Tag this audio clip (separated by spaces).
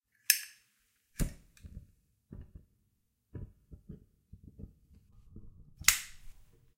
zippo click petrol stereo flame clack lighter xy snap